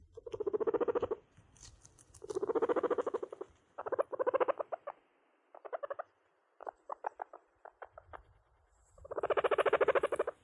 Purring sound of a guinea pig